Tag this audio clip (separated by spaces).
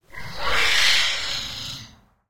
scary horror creepy ghost monster scream haunted